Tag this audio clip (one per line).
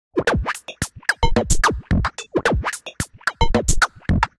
beat
bmp
110
loop
glitch
loopable
light
electronica